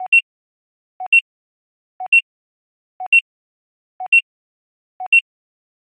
Science fiction alarm for radar or tracking an object. Synthesized with KarmaFX.